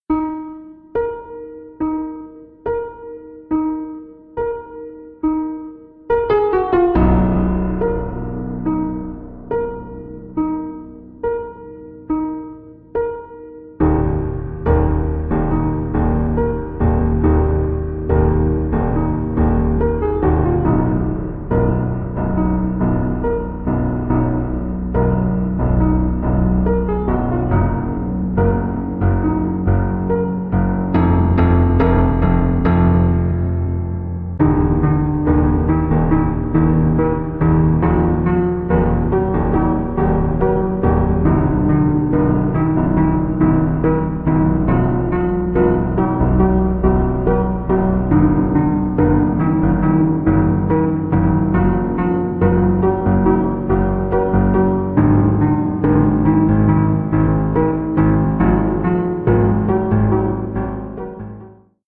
Detuned piano
Piano music ambient for my project, used in Unit 73- Sound for computer games